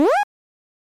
SFX Jump 07
Jump SFX / 8bit
sound, jump, 8bit, sfx